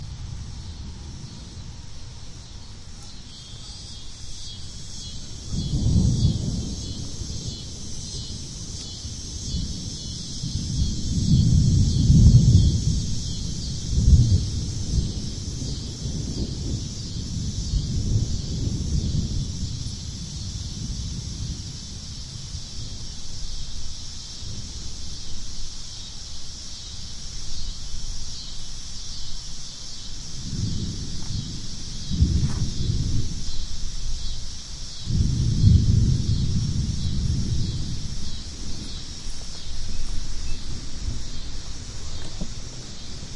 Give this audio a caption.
Cicadas w thunder 2
Cicadas with distant thunder, some foot shuffles.
Part of a series of recordings made at 'The Driveway' in Austin Texas, an auto racing track. Every Thursday evening the track is taken over by road bikers for the 'Thursday Night Crit'.
ambience, field-recording, cicadas, nature, thunder